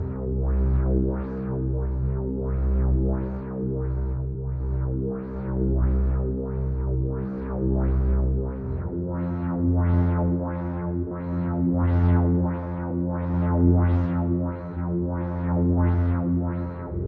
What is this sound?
background loop
background, loop, space